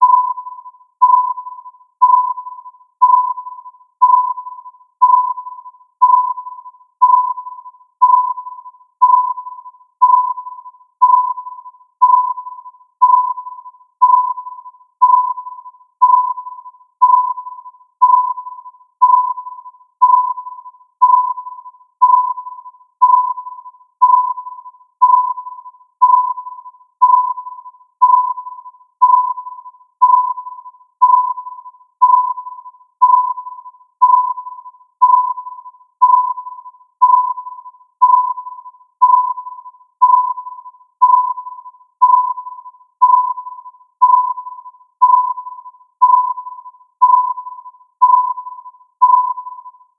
Radar, Something Detected, 50 Sec
Radar, Something Detected...
If you enjoyed the sound, please STAR, COMMENT, SPREAD THE WORD!🗣 It really helps!
no strings attached, credit is NOT necessary 💙
Radiolocation, War, Radar, Sea, Technology, Scanning, Signal, Detected, Activity, Military, Sonar, Underwater, Navigation, Surveillance, Presence